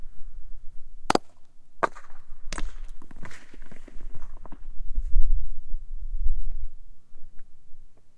rock thrown off steep rocky cliff near Iron lakes just south of yosemite.